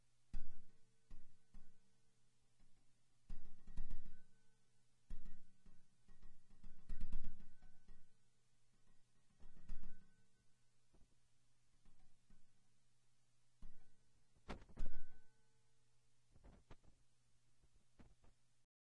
Old,Mailbox,Small,Flap,Rotary,Crank,Mechanical,
Part of a series of various sounds recorded in a college building for a school project. Recorded with a Shure VP88 stereo mic into a Sony PCM-m10 field recorder unit.
school, field-recording